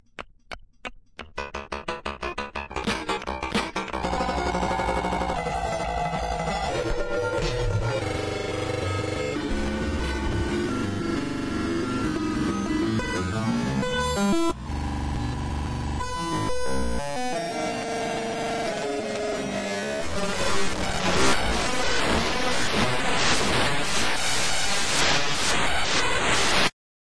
One in a small series of weird glitch beats. Created with sounds I made sequenced and manipulated with Gleetchlab. Each one gets more and more glitchy.